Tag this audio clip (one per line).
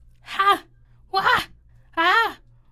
scream
yell
pain